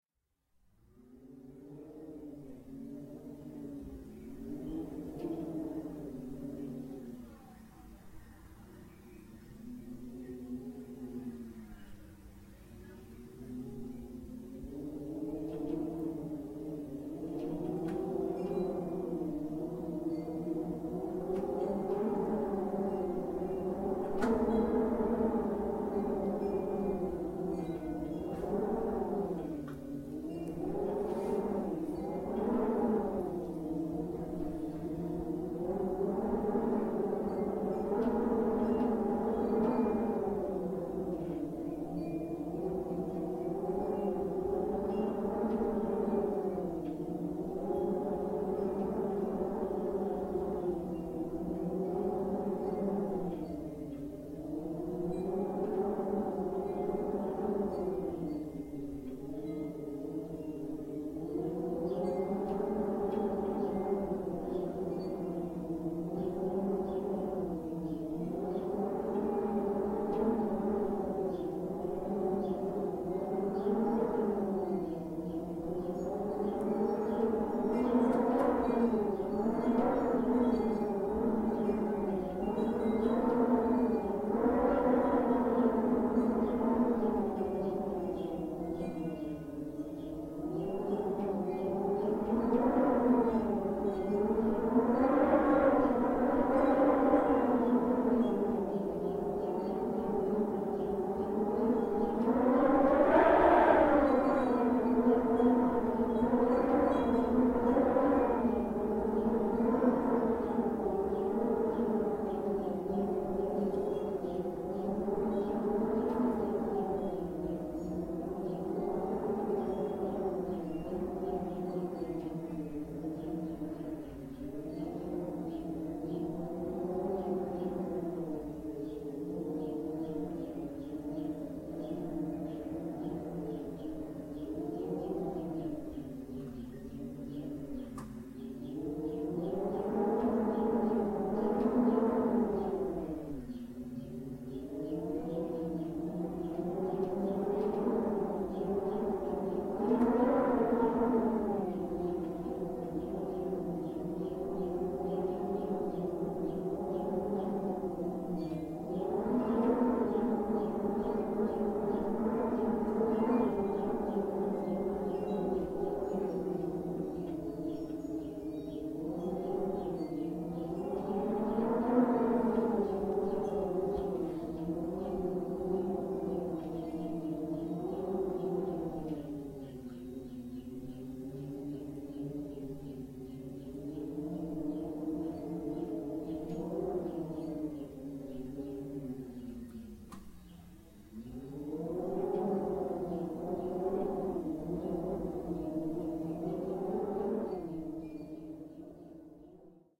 Haunting Arizona Wind 2021
A haunting wind in southern Arizona with windchimes. Recorded at an alpaca farm.
haunting, wind, wind-chimes, field-recording, desert